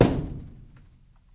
Metal object hit
bass, big, object, boom, metal, thump, kick, low